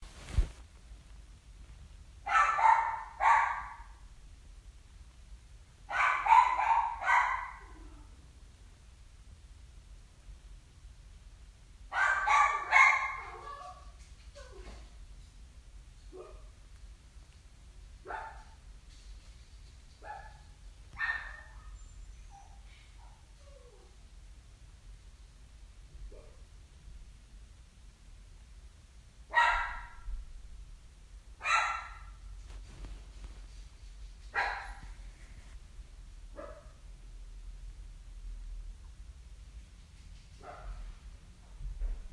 Dog Barking
Our two dogs barking from across the house when the garage door opened. One doesn’t make as much noise so it just sounds like one. He’s a poodle mix so it sounds small.
Animal, Bark, Clacking